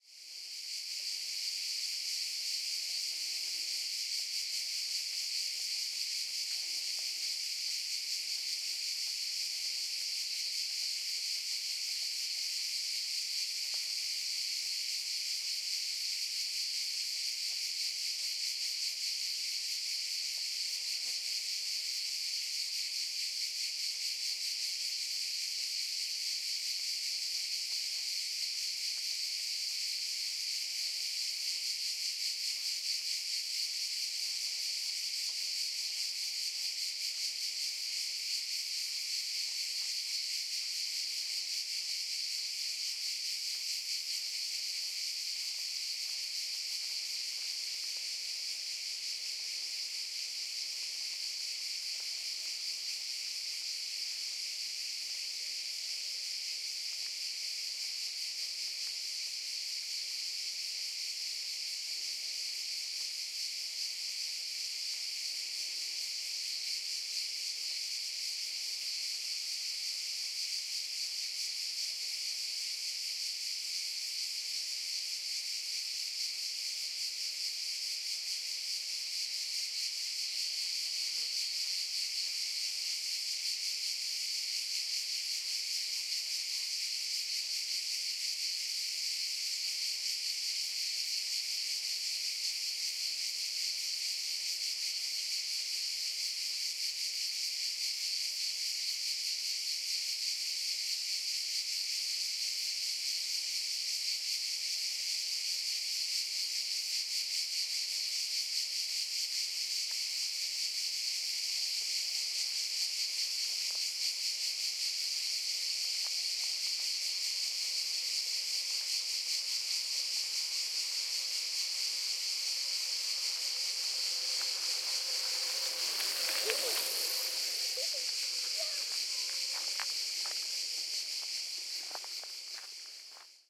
2015 Cicadas Italy Toscany Ansedonia in the end bike passing by
OKM binaural recording
Near Ansedonia, there is a forest which connects on both sides to the beach.
Unfortunately there where so many mosquitos, i could not stand for 10 seconds without getting bitten.
insects, forest, field-recording, cicadas